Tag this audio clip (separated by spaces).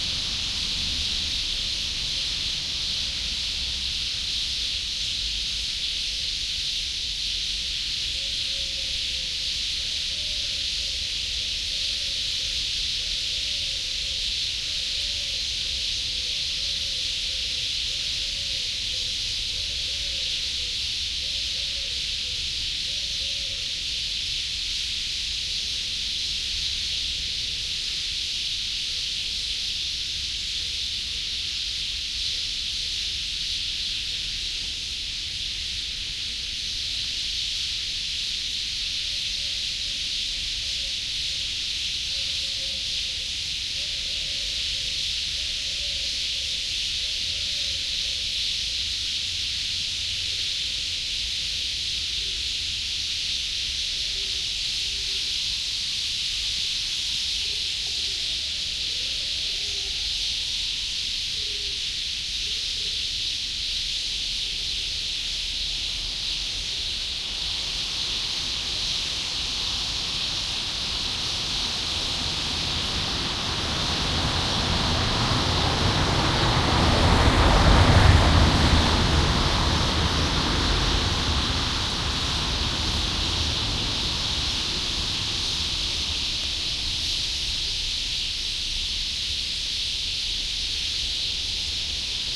birds city field-recording binaural sparrows roosting